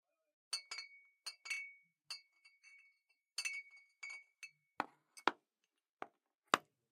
Glass bottles 2
Glass bottles clinking.
{"fr":"Bouteilles en verre 2","desc":"Des bouteilles en verre.","tags":"bouteille verre vin entrechoquer ding cling"}